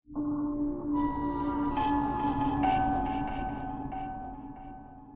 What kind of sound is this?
high pitched metal gate sound played in 4 notes.
horror atmosphere.
dark, spooky, horror, halloween, psy, ambient, intro, scary, creepy